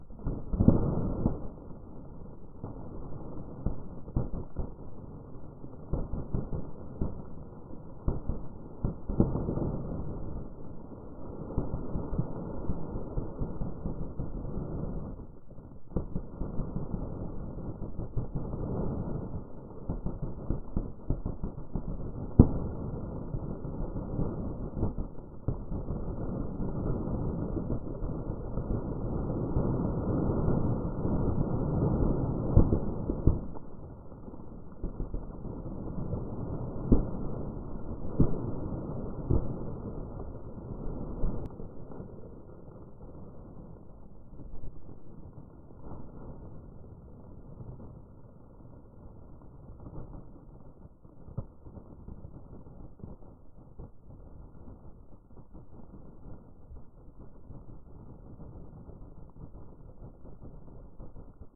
War Noises (Distance Explosions)
Sounds of war in the distance, 1 minute long.
Scraping fabric recorded, layered, and slowed.